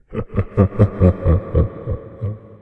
Mischievous Laugh 2
Sound of a man laughing mischievously with Reverb, useful for horror ambiance
ambiance, phantom, suspense, haunted, horror, drama, fear, sinister, laugh, fearful, creepy, evil, spooky, terror, scary